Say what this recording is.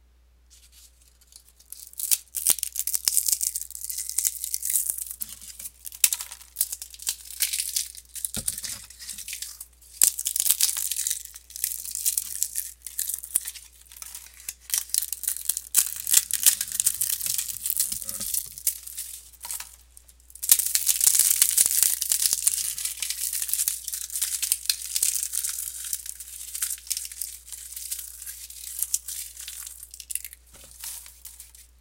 Crunching Leaves
Leaves being crunched but can sound like other things if needed
crackers, Crunching, Crunching-leaves, fall, Fire-crackers, Leaves, Outside